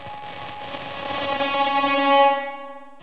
Some Djembe samples distorted
distortion, noise, drone, dark, perc, experimental, sfx, distorted